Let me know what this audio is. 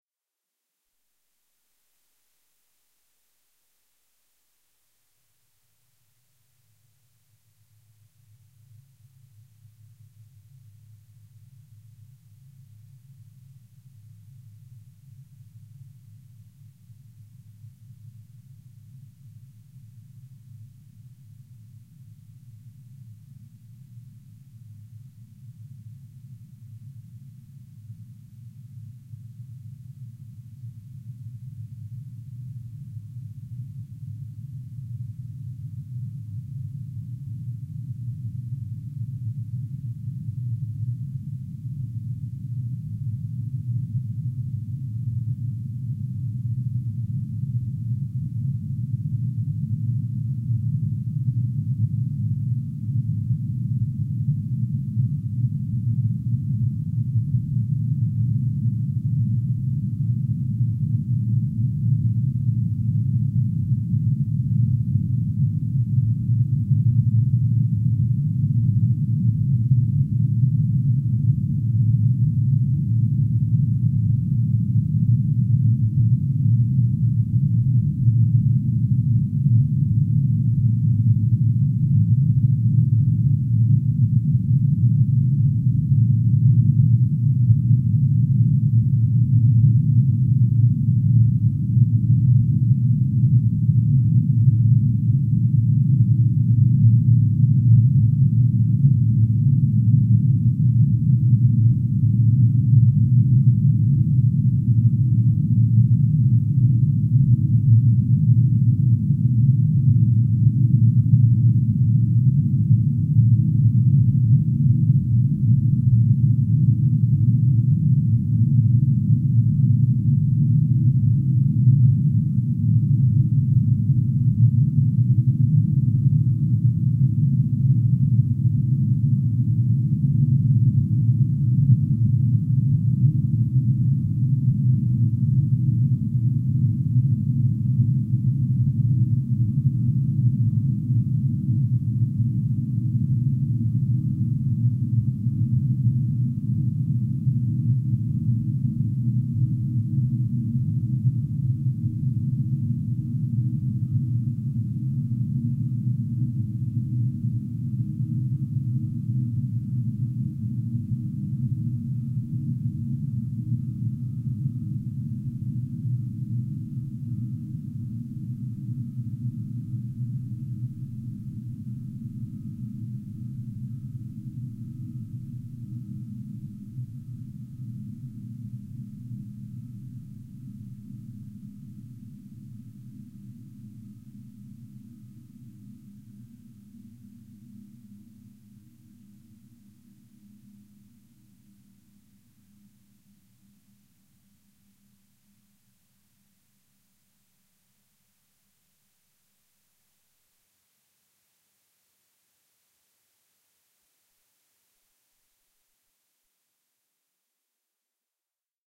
LAYERS 017 - MOTORCYCLE DOOM-121
LAYERS 017 - MOTORCYCLE DOOM is a multisample package, this time not containing every single sound of the keyboard, but only the C-keys and the highest one. I only added those sounds because there is very little variation between the sounds if I would upload every key. The process of creating this sound was quite complicated. I tool 3 self made motorcycle recordings (one of 60 seconds, one of 30 seconds and the final one of 26 seconds), spread them across every possible key within NI Kontakt 4 using Tone Machine 2 with a different speed settings: the 1 minute recording got a 50% speed setting, while the other 2 received a 25% setting. I mixed the 3 layers with equal volume and then added 3 convolution reverbs in sequence, each time with the original motorcycle recordings as convolution source. The result is a low frequency drone like sound which builds up slowly and fades away in a subtle slow way. I used this multisample as base for LAYERS 017 - MOTORCYCLE DOOM 2